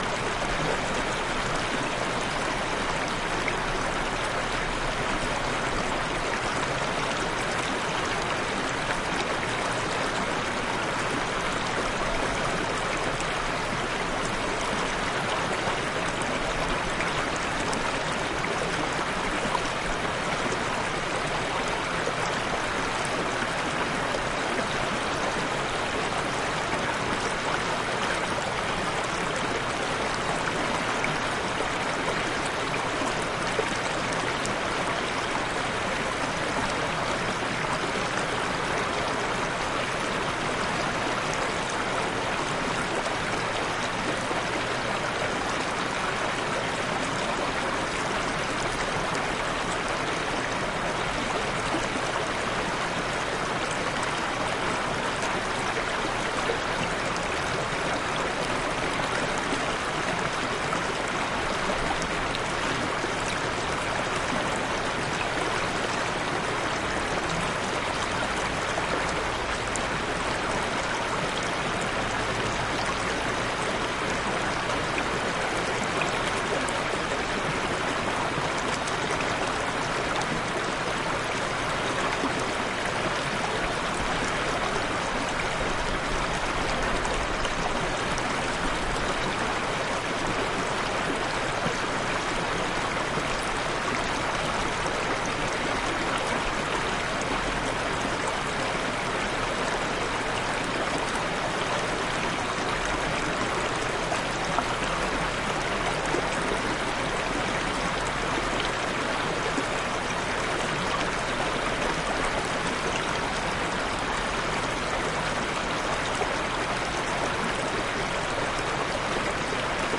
Mountain Stream
A stream in the Poconos, near Bushkill Falls, PA. Used a minidisc recorder and 2 small plugin mics. Just EQ'ed a bit to take off any low wind rumbles to get a cleaner sound. Hope you like it.
field-recording, Stream, Water